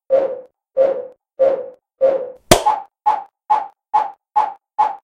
STECZYCKI Ronan 2016 2017 human breath
I generated a default track and took a single note from it. I then erased the rest, and repeated what was left ten times. I put an equal lenght of silence between each of them, and amplified the first four notes. I recorded myself claping my hand and inserted it after the fourth one, and then amplified and accelerate the tempo of the six notes that where left. I tried to make it sounds like a man’s breathing before and during an effort.
Typologie : V’’
Masse: groupe tonique
Timbre harmonique: sec
Grain: résonance et itération
Allure: vibrante
Dynamique: abrupte
Profil mélodique: serpentine
breath, human